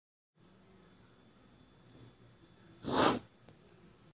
Sound recorded with my smartphone, sliding my pen with the wood, enjoy!
slide, wood